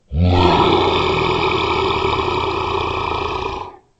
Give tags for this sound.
beast; creature; dragon; roar; sad

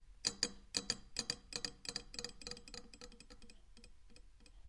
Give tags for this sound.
kitchen; sieve; jiggle

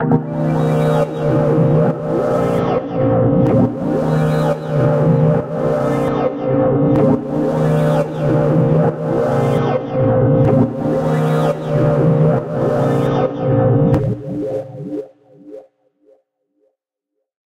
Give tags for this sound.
morph; processed; massive; electronic; rhythmic; synth; sci-fi; ambience; electro; music; ableton; atmosphere; loop

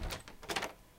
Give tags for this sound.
open; door

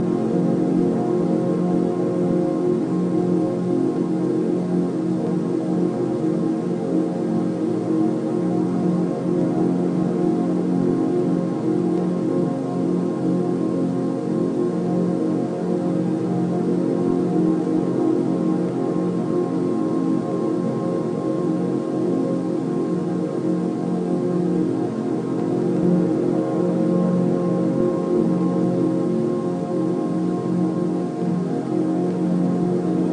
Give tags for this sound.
ambient; loop; drone; atmosphere

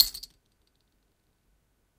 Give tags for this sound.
experimental; metallic

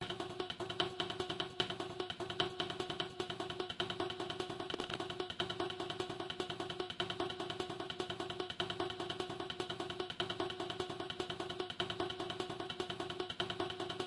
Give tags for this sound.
150; FX; beat; effect

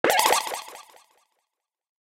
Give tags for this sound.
audio; retro; shoot; game; pickup; gamesound; sfx

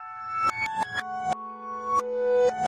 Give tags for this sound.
strange; piano; reversed-piano; relaxing; reversed

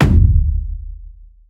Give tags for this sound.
kick-drum; designed; effected; kick; processed; bassdrum; oneshot; kickdrum; one-shot; bottle

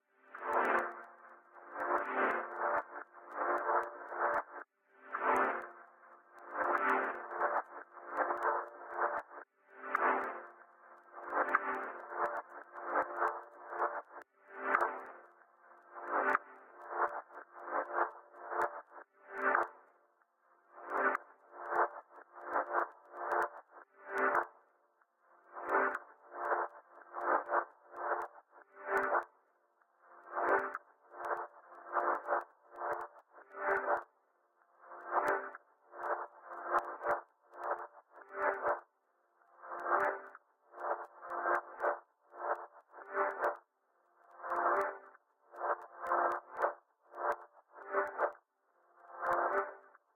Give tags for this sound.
ambient; delay; glitch; rhythmic; sound-design

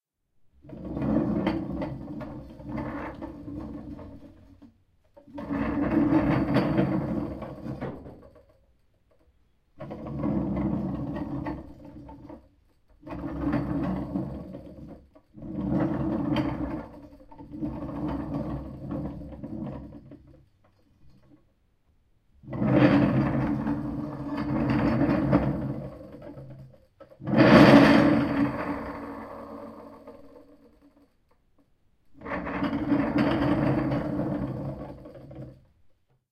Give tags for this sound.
rollers; wheels; xy

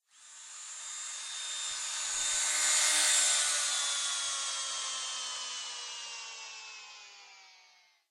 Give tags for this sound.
drill; electric; industrial; machine; machinery; mechanical; motor; pass-by; robot; robotic; sci-fi; servo

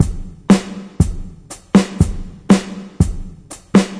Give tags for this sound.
beat; dubstep; loop

